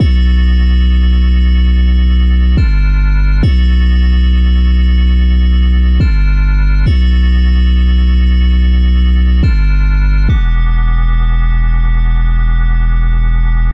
Cinematic Trap Bass with Bells (Bm - 140)
Cinematic Trap Bass with Bells. Key: Bm - BPM: 140